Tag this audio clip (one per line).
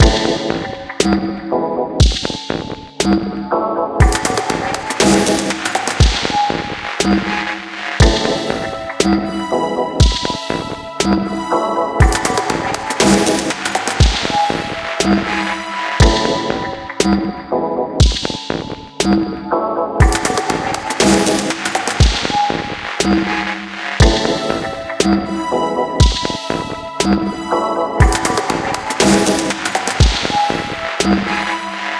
Dance
EDM
Electronic
Glitch
Music